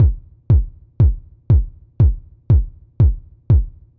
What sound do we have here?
Kick house loop 120bpm-03
loop, 120bpm, kick